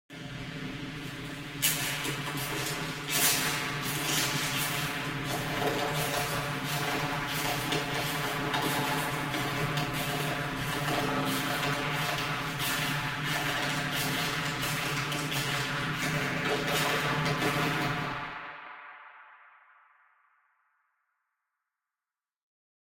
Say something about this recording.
Radio Interference
I'm not really sure what i was going for, but it turned out to be this. I'd describe it as aliens trying to communicate with humans through radio or something. Original sound was me kicking snow off of a small bush. Recorded on my iPhone8. Edited in Audition.
data, bionic